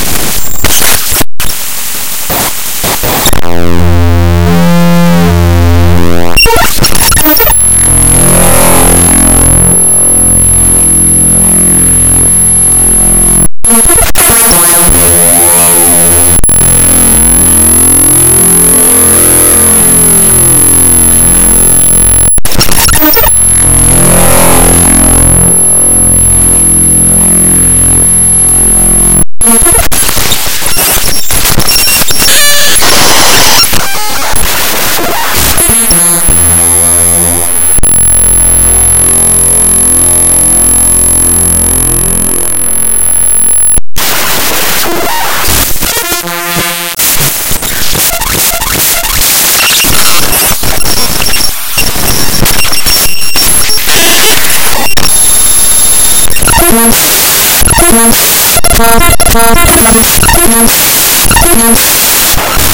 Raw data from programs on my computer thrown into audacity